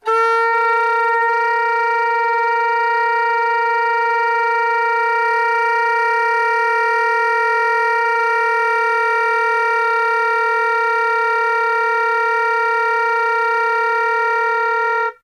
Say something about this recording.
The number of file correspond to the numbering of the book:
Le sons multiples aux saxophones / Daniel Kientzy. - Paris : Editions Salabert,
[198?]. - (Salabert Enseignement : Nuovelles techniques instrumentales).
Setup:
soprano-sax, multiphonics, saxophone, sax